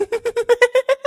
Creepy, overly friendly, dude laugh.